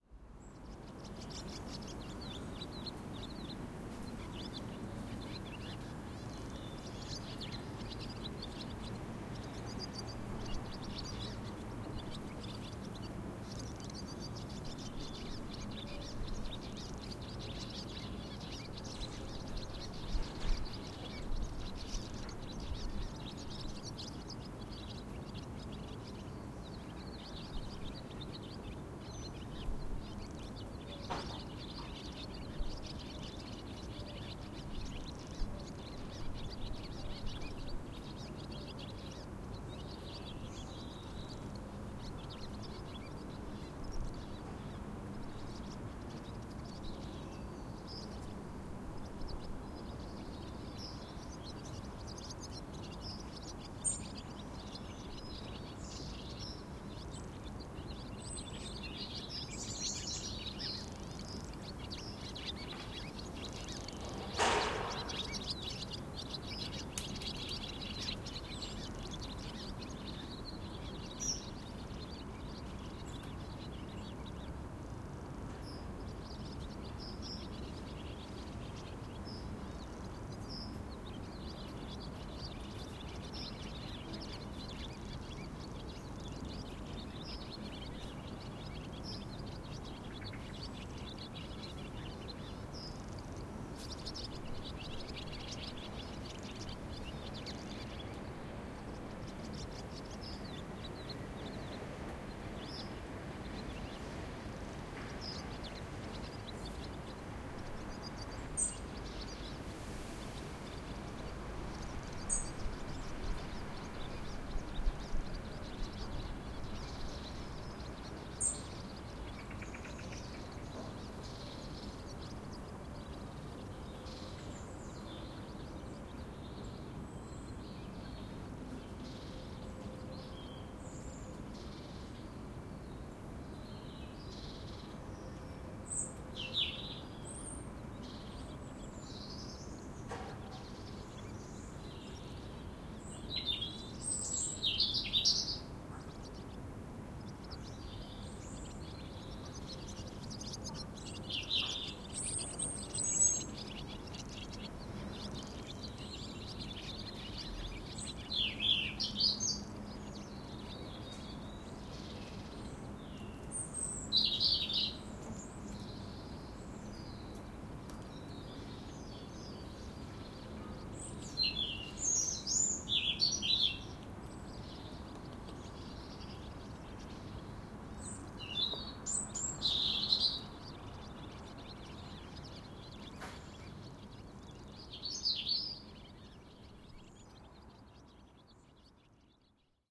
27 Urban Background Sound
I made a series of recordings of urban sounds from my open living room window between late July and early September 2014. These recordings were done at various times of the day.
I am using these as quiet background ambiance on a short play due to be performed in the near future. Recorded with a Roland R26.